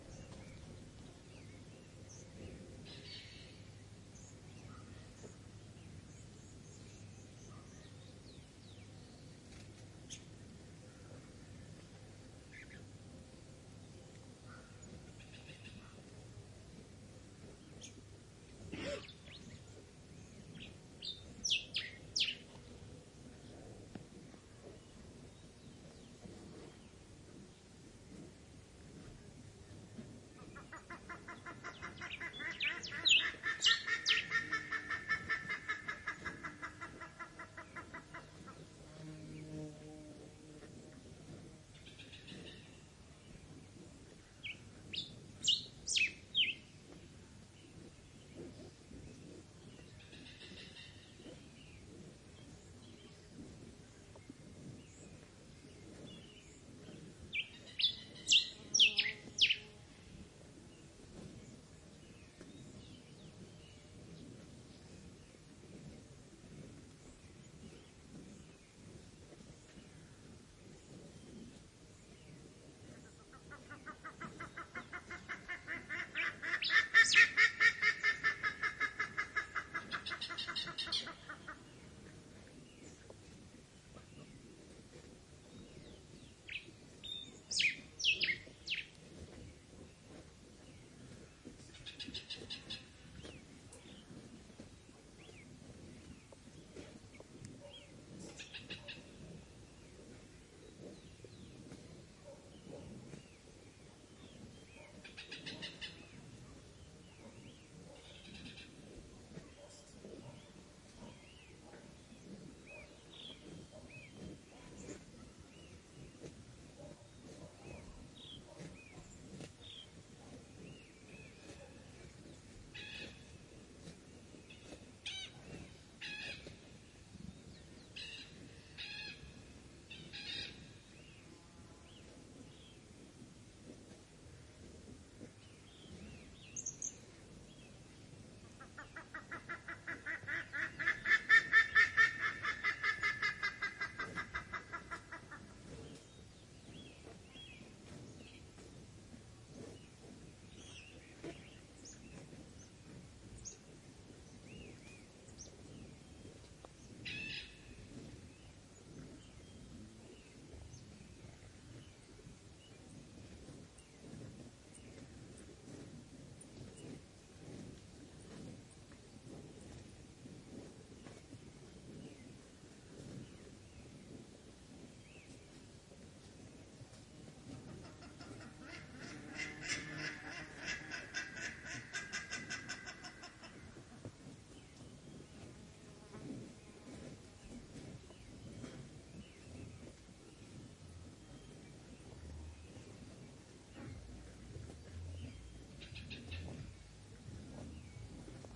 Rural road to Ahoni with grass munching cow
A cow eats grass in company of Keltehues, Bandurrias (Buff-necked Ibis) and insects.
Recorded on a MixPre6 with LOM Usi Pro microphones in Ahoni, Chiloé.
ahoni, field-recording, grass, keltehue, rural, usi-pro